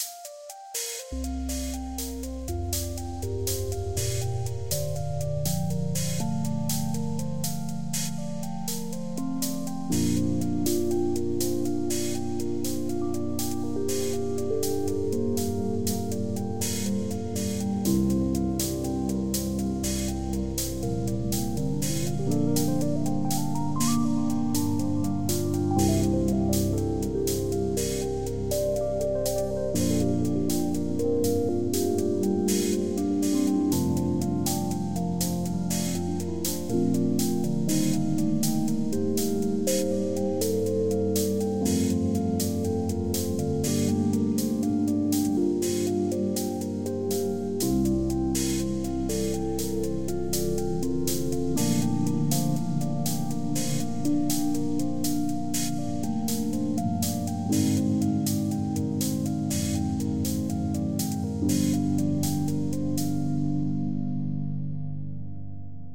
Space ambient music fragment
minimal cosmic electronic music short sample
ambient, cosmic